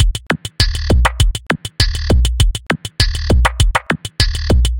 Mixcoatl RaggaTronic
Beat, Drumloop, Filter, Latino, Organic, Raggatronic, Reggeaton, Strange, Weird